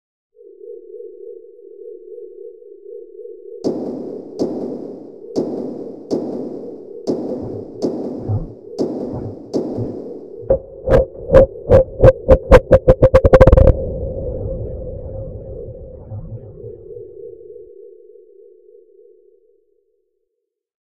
short sms coin
short, coin